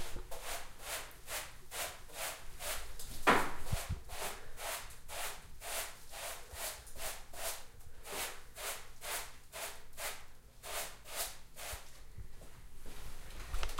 sweeping the floor